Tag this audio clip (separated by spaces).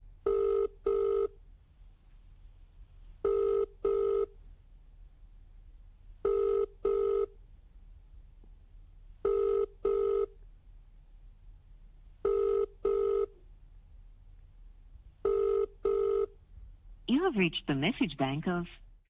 phone ringing